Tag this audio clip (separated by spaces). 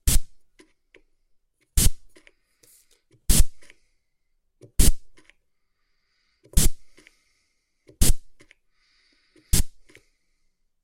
spraying spray onesoundperday2018